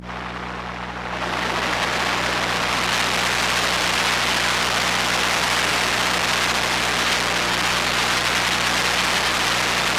Phone transducer suction cup thing on various places on an alarm clock radio, speakers, desk lamp bulb housing, power plug, etc. Recordings taken while blinking, not blinking, changing radio station, flipping lamp on and off, etc.